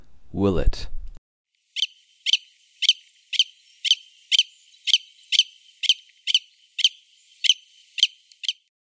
This willet was sitting on a wire calling out its' territory border to others that could hear it. The call is not the usual "Wiww-wett", it is just a simple "Kep! Kep! Kep!" repeated over and over again.